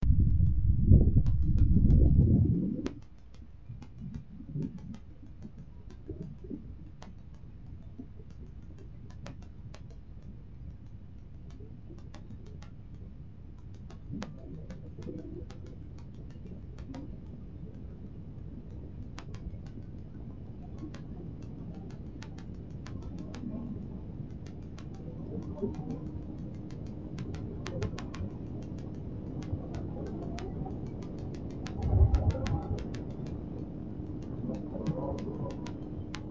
mystery mic on PC fan
mysterious mic noise next to PC fan